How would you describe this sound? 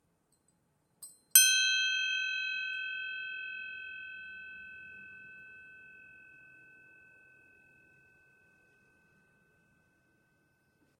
A single beat of a bell.
See also in the package
Mic: Blue Yeti Pro